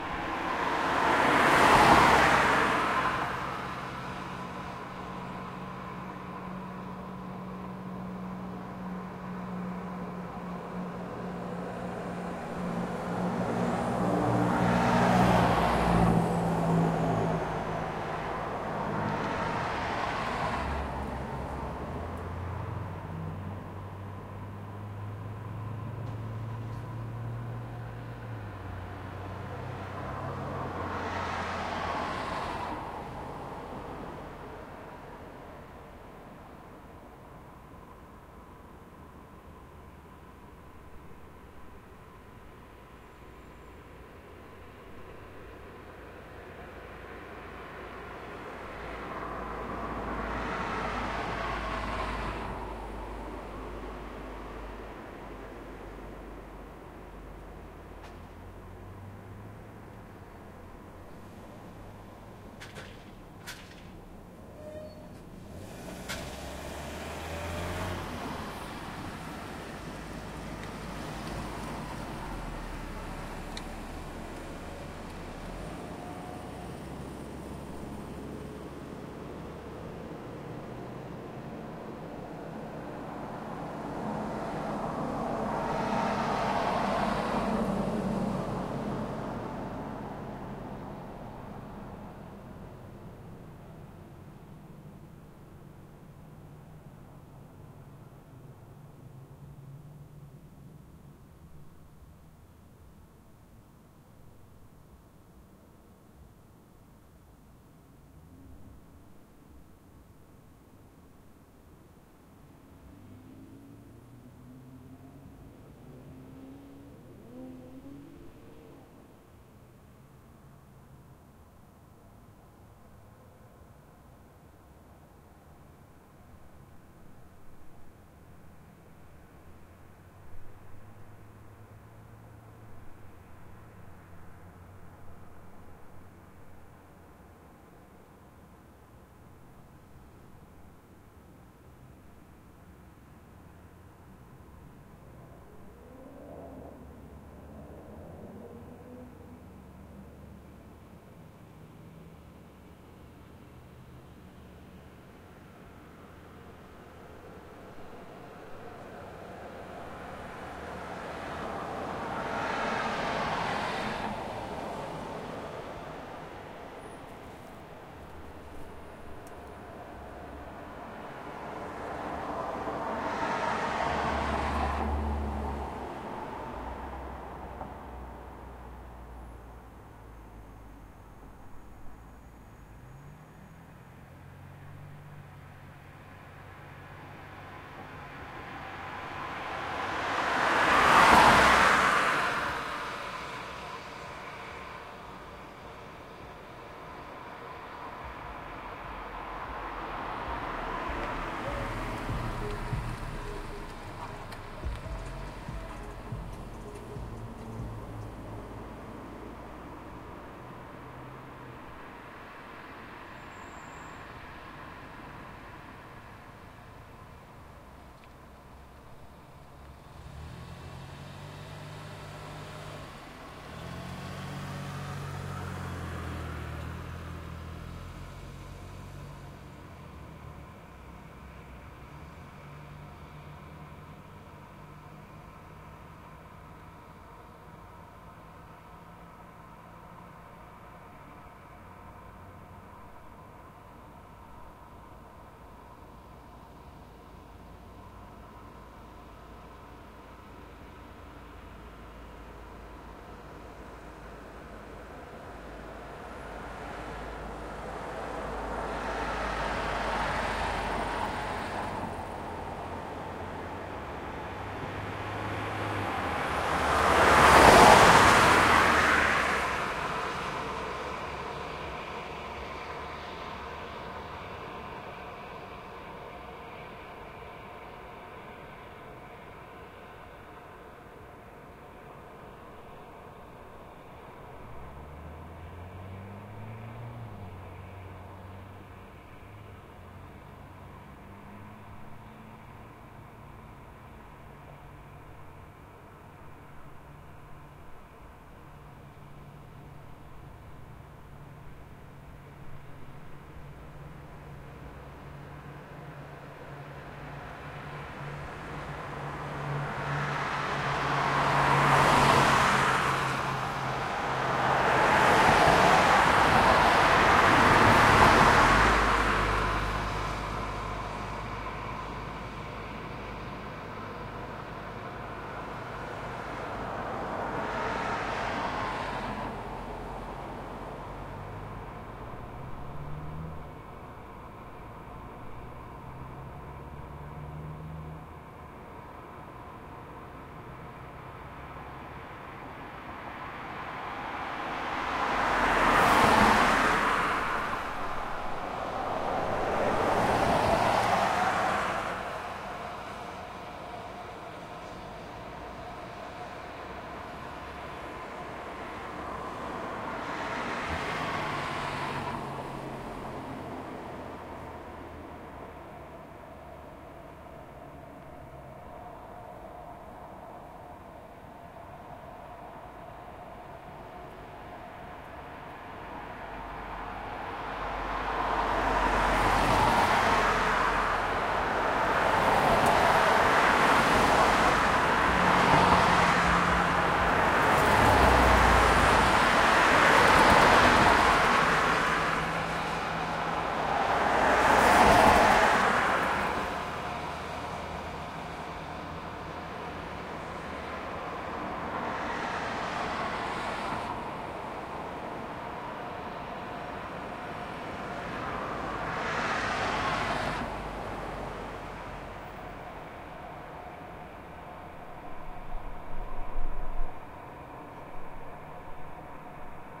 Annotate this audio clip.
Traffic noise outside shopping centre
Traffic noise of vehicles driving by outside shopping centre. Dalton Road, Epping VIC 3076. Australia. Recorded with Zoom H4n Pro. Enjoy.
Melbourne, Australia.
street, traffic, noise, driving, cars, field-recording, road, town, city